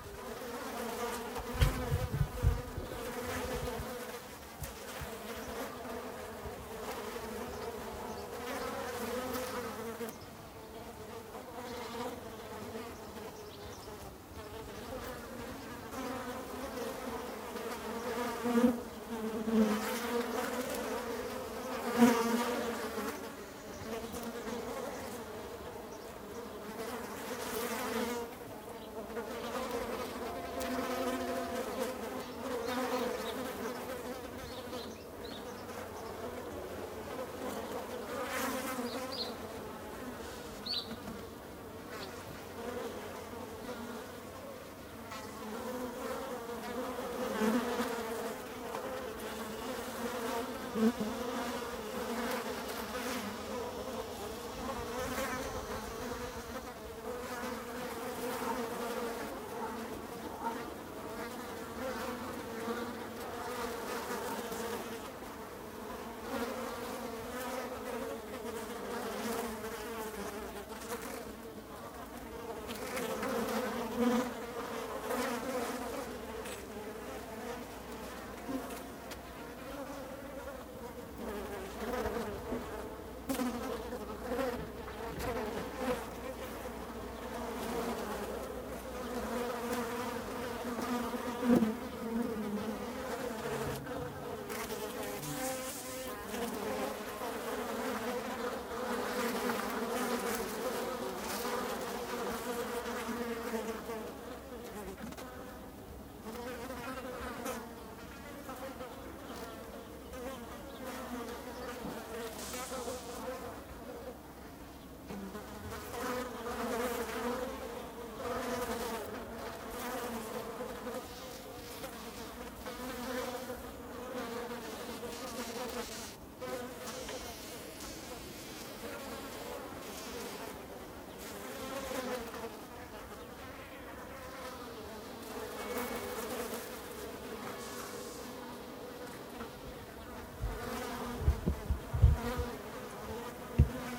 A bunch of flies without many side noises. Beware of the handling noise in the beginning and the end. Recorded with a Schoeps CMIT 5U on a Zoom F8.